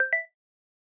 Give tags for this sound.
click
game
user-interface
beep

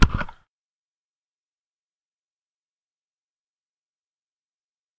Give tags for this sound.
bump
click
hit
mic

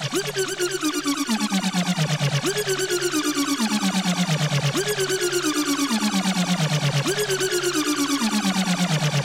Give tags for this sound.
electronic
synth
arpeggio
arpeggiator
loop

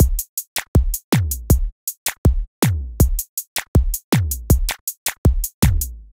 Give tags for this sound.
beat
loop
zouk

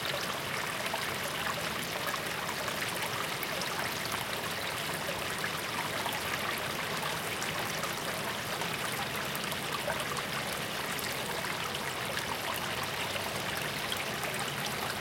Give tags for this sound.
flow
water
relaxing
liquid
river
stream

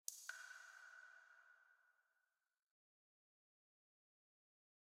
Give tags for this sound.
cave
dark
drop
droplet
echo
echoing
mysterious
processed
reverb
water

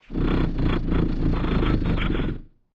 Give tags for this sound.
scarecrow
horror
zombie
ghost
creature
monster
ghoul

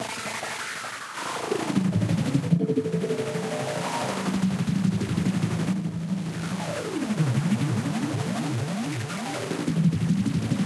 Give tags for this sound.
Apoteg
glitch
lo-fi
synth
experimental
scary
weird

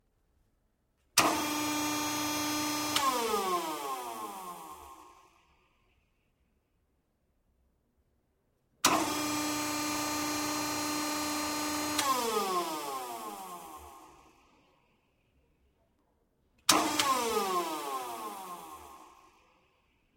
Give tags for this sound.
machinery
industrial
factory
spool
machine
pump
whir
robotic
motor
robot
hydraulic
mechanical

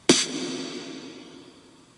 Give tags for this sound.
hit
synthesized
heavy
electronic
kit
powerful
electro
drum
snare
loud
percussion

